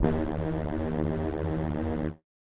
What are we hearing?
Magnetic sound for a attraction
attraction, laser, signal, experiment, laboratory, sound-design, arcade, space-war, robot, video-games, modulation, games, blast, computer, damage, digital